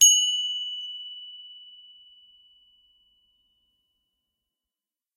Small bronze bell.
small, bell